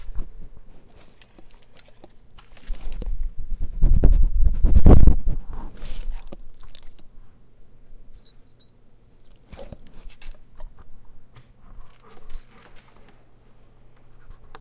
Dog Breathing
breathing, canine, puppy